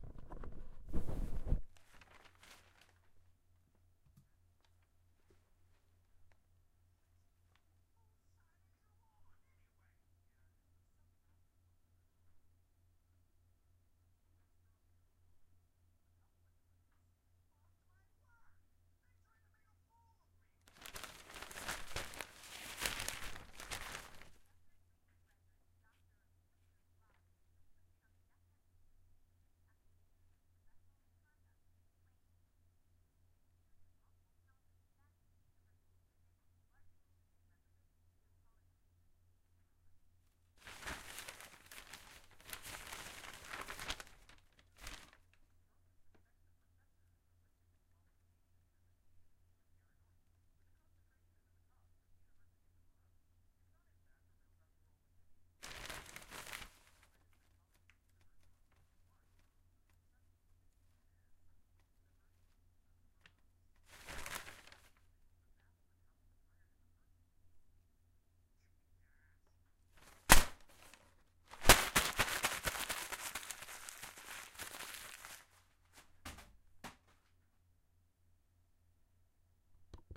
newspaper rustling
various sounds of a newspaper being rustled, then crumpled up and thrown at the end